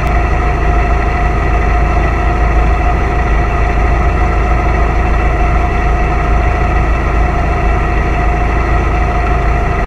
Main Engine
The sound of a 2.2L 4-Cylinder engine while standing directly in front of the engine.
stethoscope, engine, Noise